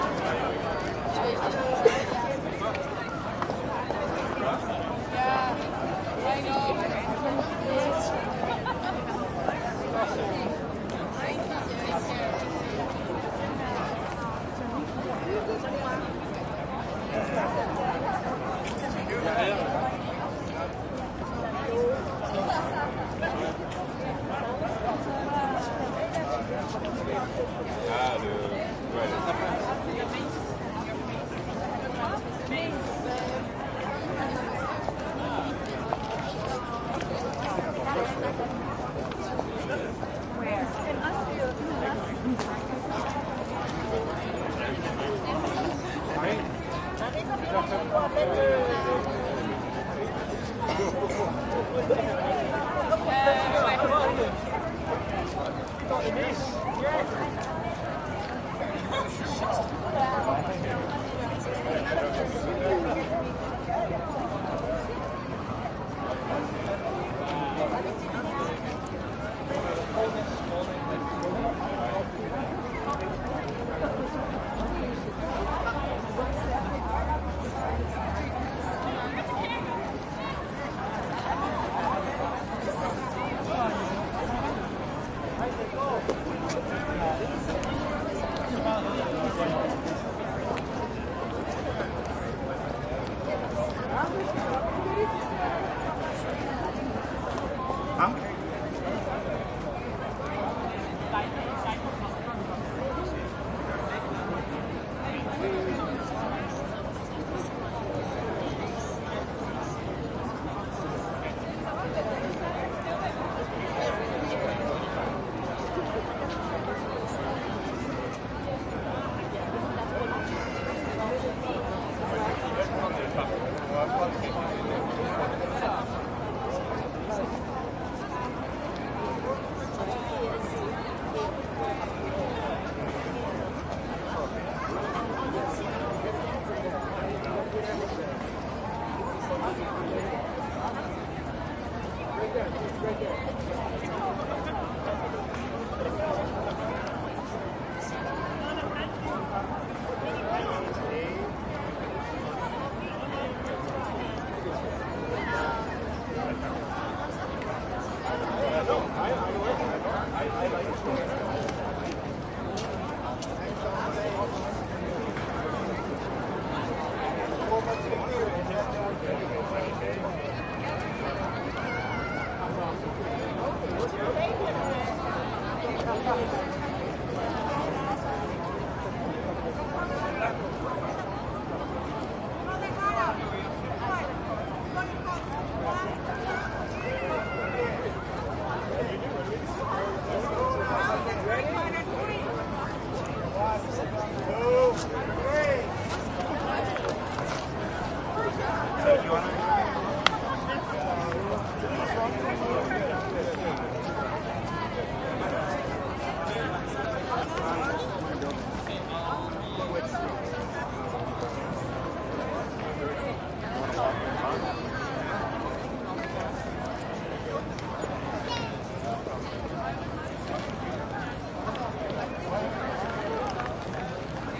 crowd ext medium active walla and steps after graduation McGill University, Montreal, Canada
Montreal, crowd, happy, University, steps, graduation, medium, walla, active, after, McGill, ext, Canada